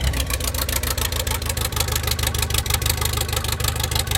JCB Engine Low Revs
Buzz, electric, engine, Factory, high, Industrial, low, machine, Machinery, Mechanical, medium, motor, Rev